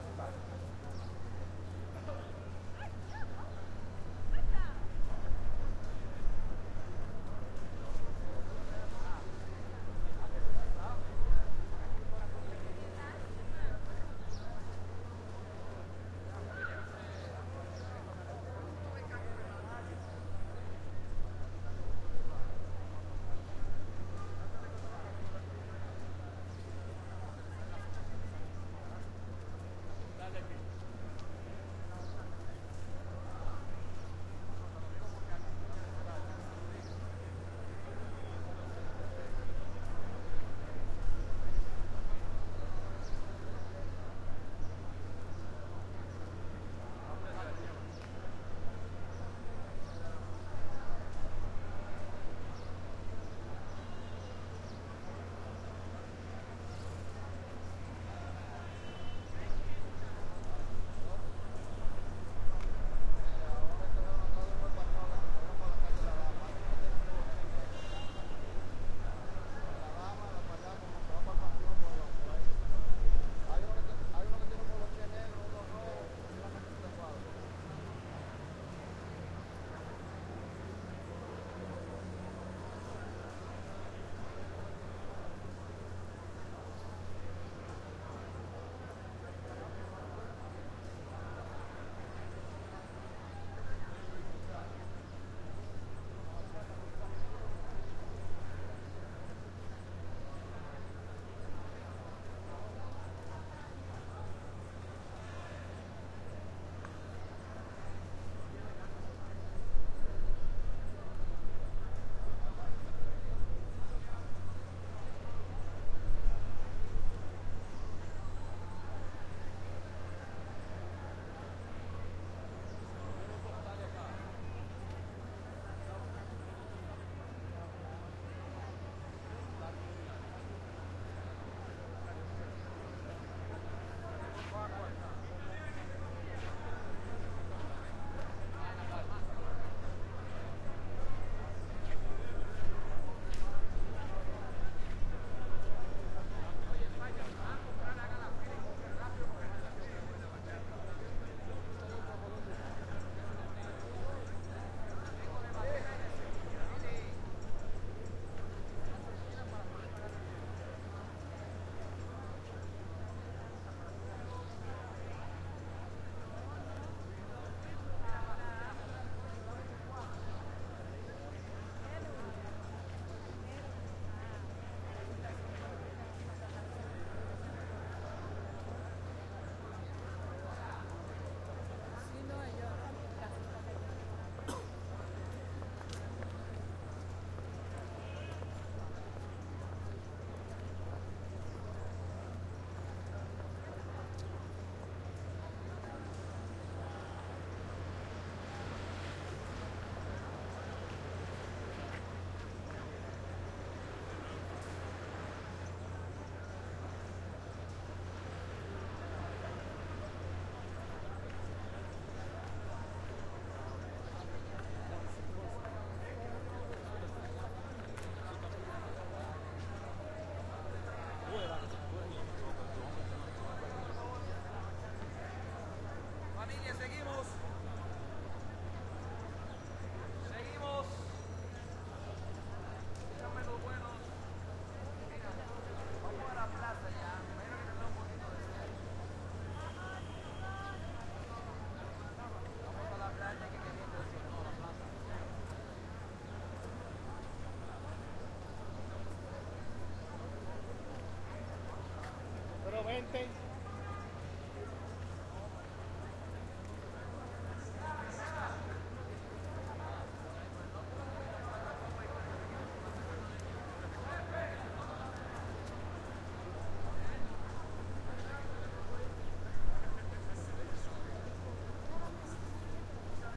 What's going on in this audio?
Santo Domingo-May 15-Plaza Colon
Soundscape recording in Plaza Colon, in the Colonial Zone in Santo Domingo in the Dominican Republic. May 15, 2009.